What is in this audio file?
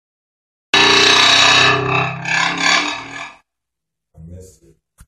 Demolition site metal pipe in concrete hole + Gravity.